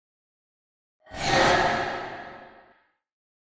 Woosh, Ghost, Arrow pass, Fast, Curse, short.
ghost, swish, swosh, woosh